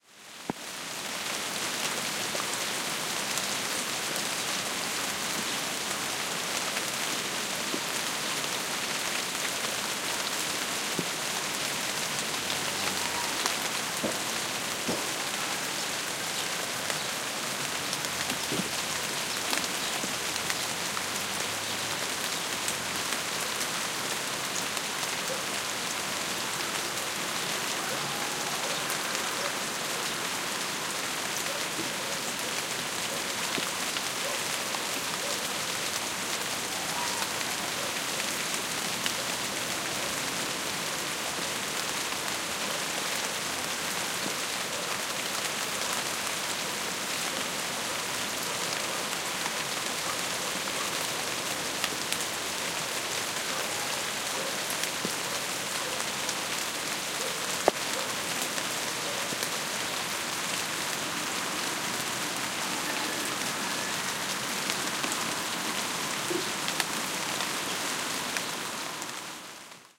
20161127 rain.on.foliage
Soft rain falling on an Orange orchard, very soft bird tweets in background. Primo EM172 capsules into FEL Microphone Amplifier BMA2, PCM-M10 recorder.
field-recording, leaves, nature, rain, trees